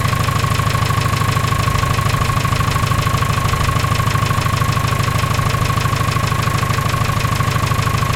JCB Engine Medium Revs Inside Cab
low, Industrial, Buzz, Rev, motor, medium, Machinery, high, engine, Factory, Mechanical, electric, machine